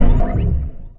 STAB 054 mastered 16 bit
Electronic percussion created with Metaphysical Function from Native Instruments within Cubase SX.
Mastering done within Wavelab using Elemental Audio and TC plugins. A
weird short electronic effect for synthetic soundsculpturing.
Resembling "STAB 053 mastered 16 bit" but without the high frequency
content.
electronic, percussion, stab